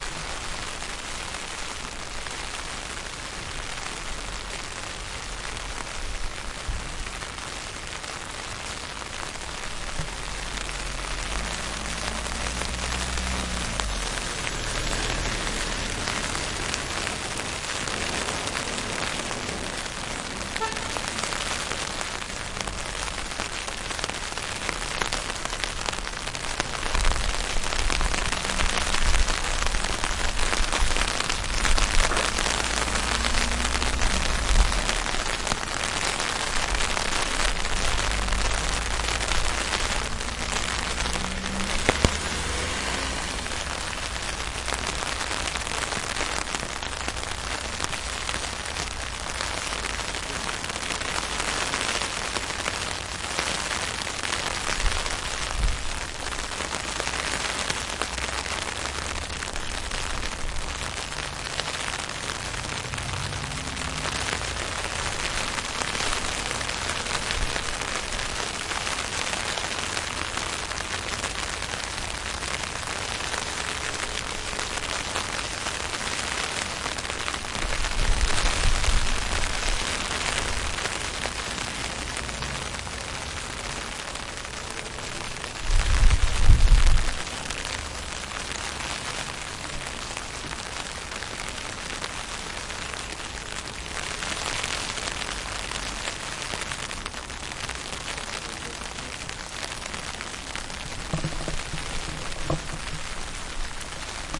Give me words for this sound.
umbrella under rain
Bruit des gouttes d'eau frappant un parapluie. « Demandez à un Basque pourquoi il pleut, il vous répondra : il ne pleut pas, il est vert !
Les commentaires sont aussi les bienvenus :-)
Sound of raindrops hitting an umbrella.
Want to support this sound project?
Many many thanks